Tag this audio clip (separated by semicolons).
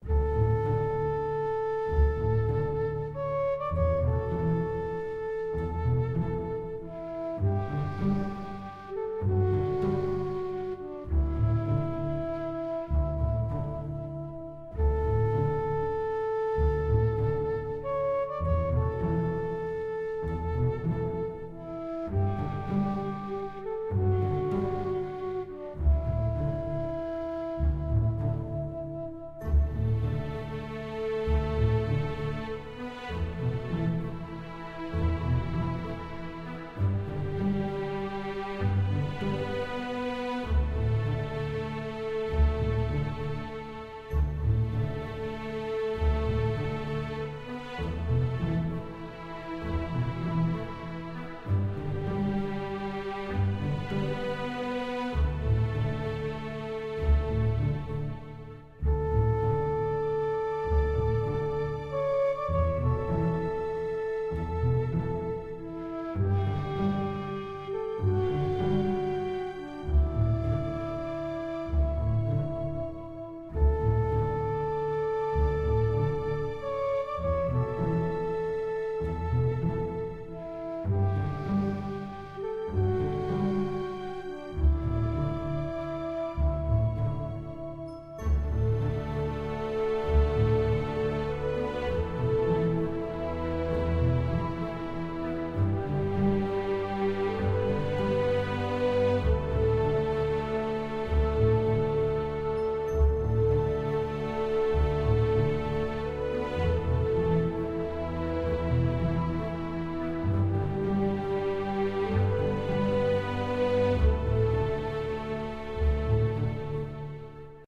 calming; loop; orchestra; relaxing; string; violin; woodwind